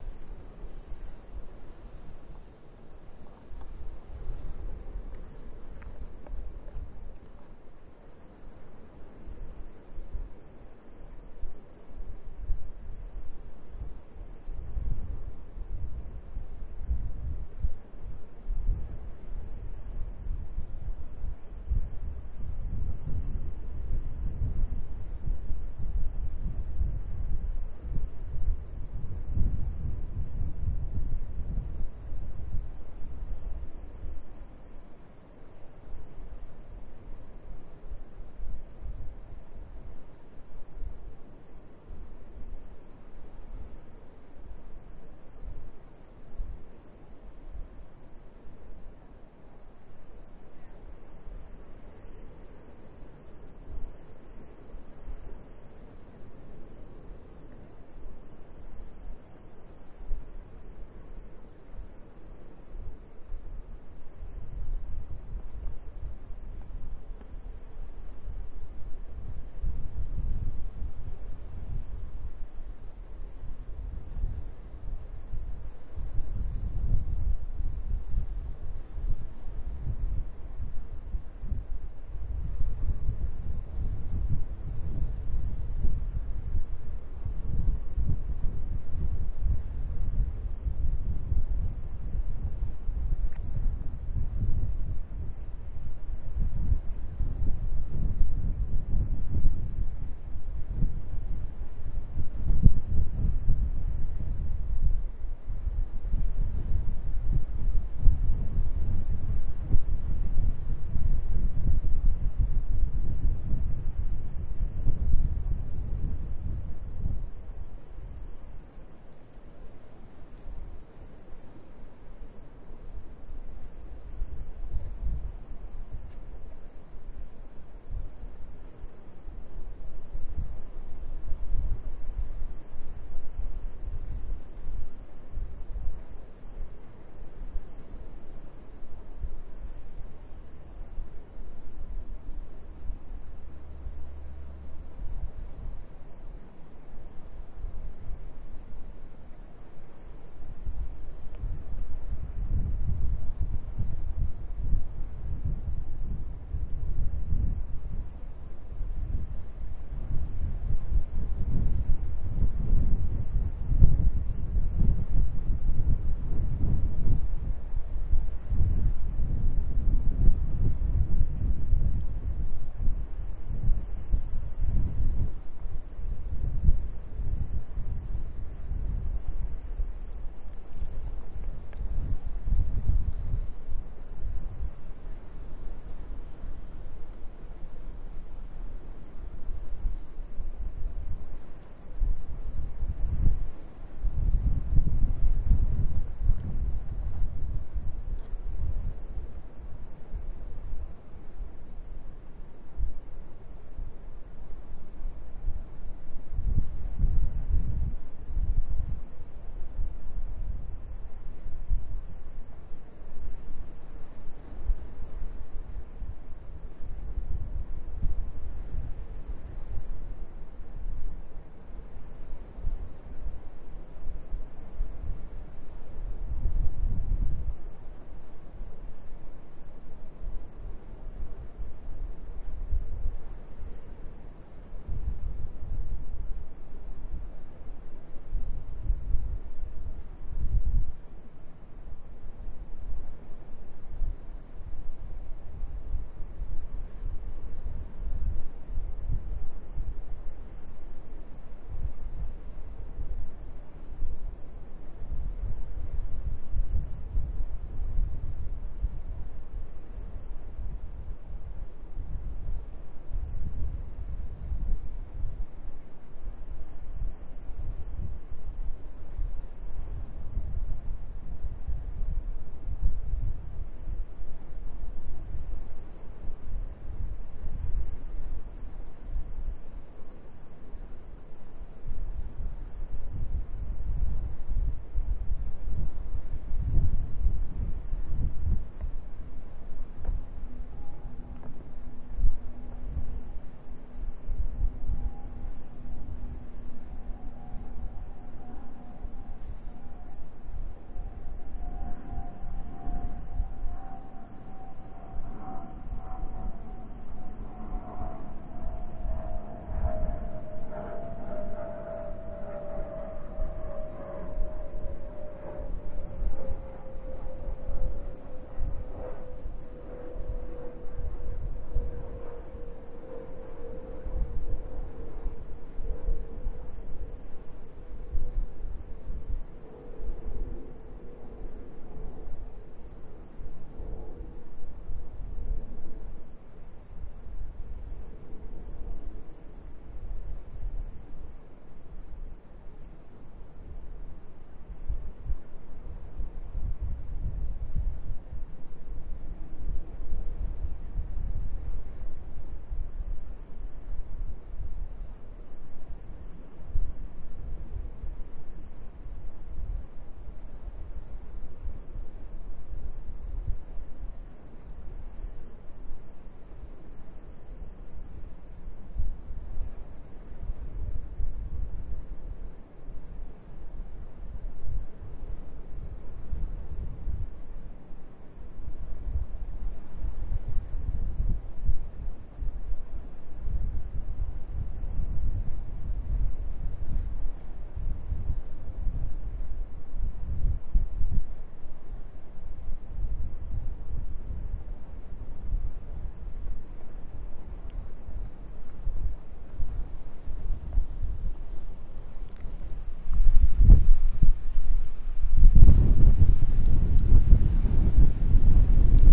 Some recordings of Menorca in vacations the last summer.
sea, summer, menorca, wind, island